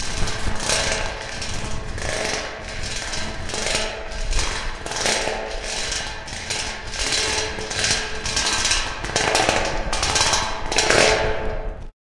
Cyril Voignier 2013 Metallic Sound
A record sound.
- Boost low
- Boost volume
- speed -19
- sewer reverb
metallic, strange